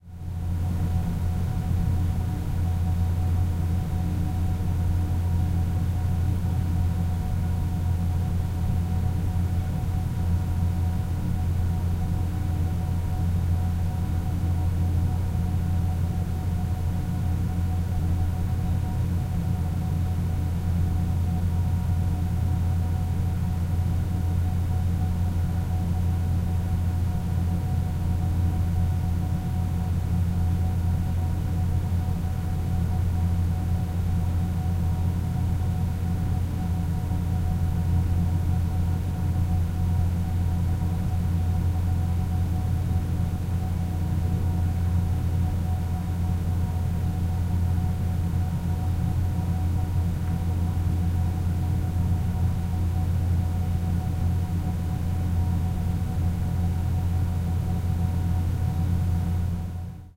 Roomtone Hallway Spinnerij Front
Front recording of surround room tone recording.
surround, sounddesign, roomtone